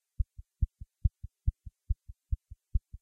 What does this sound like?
Heart 2.35Hz
Heart Sound FX with 2.35Hz.
Created with Cubase 6.5.
effect, effekt, film, fx, heart, herz, movie, sound, soundtrack, synth